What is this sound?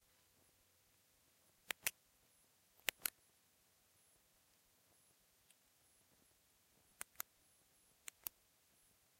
Clicking open a pen